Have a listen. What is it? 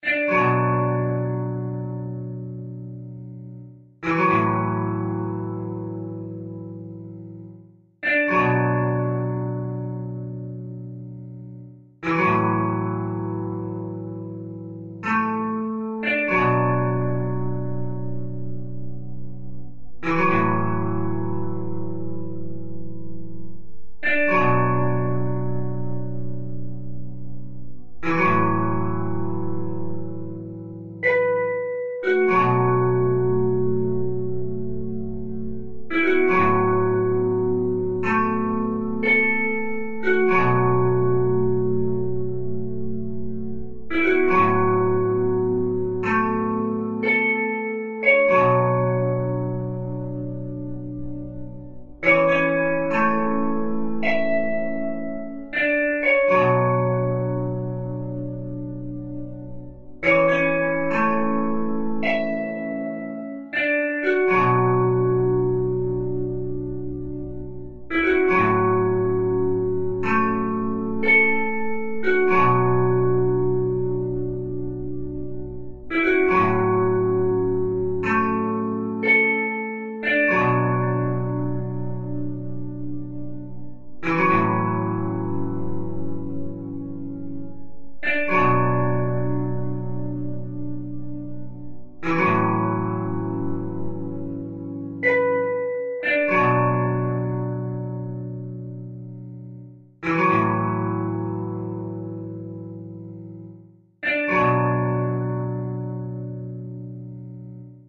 Atmospheric Ambient Fake Guitar Piece
melancholic, moody, melancholy, guitar, music, dark, atmospheric, piece, echo, ambient, mood, atmosphere, reverb